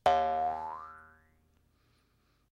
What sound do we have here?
jaw harp1
Jaw harp sound
Recorded using an SM58, Tascam US-1641 and Logic Pro
boing, bounce, doing, funny, harp, jaw, silly, twang